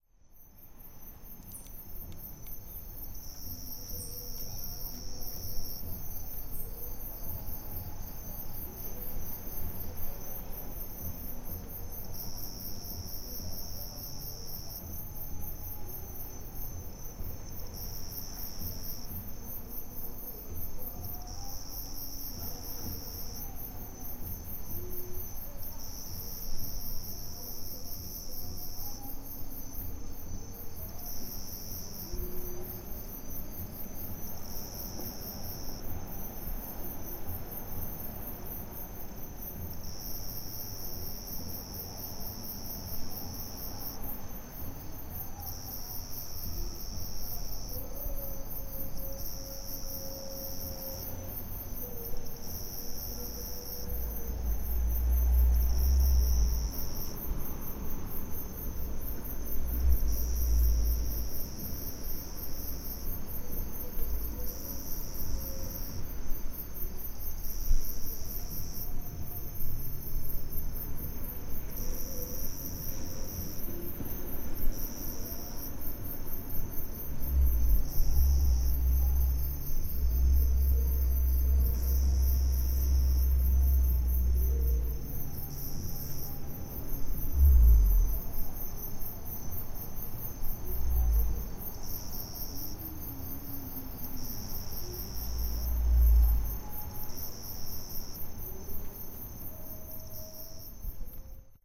Night ambience at Praia Branca, Brazil